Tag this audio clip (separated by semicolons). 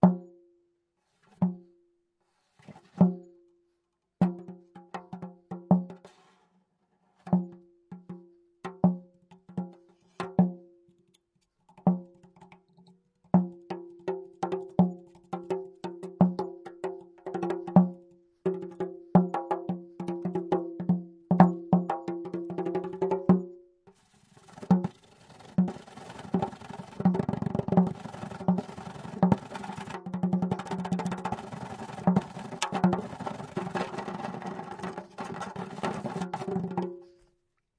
accelerated; bendir; hand